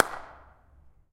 Viaduct Impulse-Response reverb 5m away clap
5m, away, clap, Impulse-Response, reverb, Viaduct